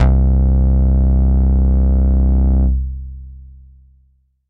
This is a recorded bass sample played with the mopho x4.